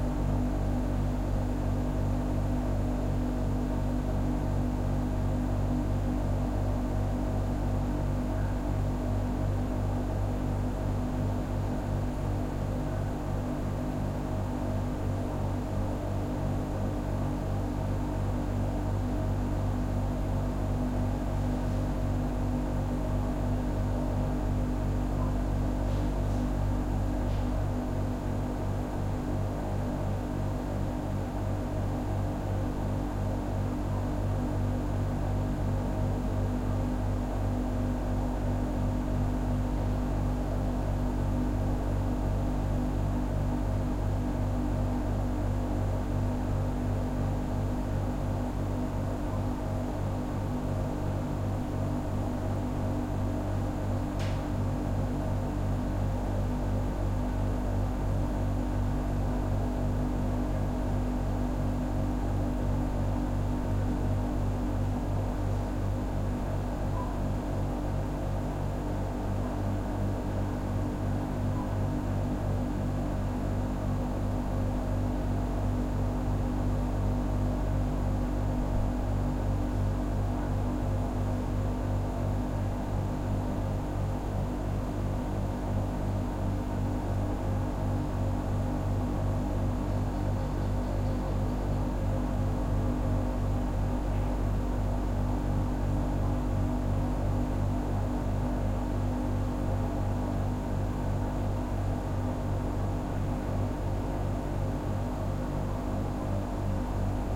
Thailand room tone large bathroom or morgue very live with low pusling freezer compressor hum through wall
Thailand room tone small live room with resonant throaty bassy traffic passing by through open windows
live, field-recording, Thailand, morgue, large, hum, bathroom, roomtone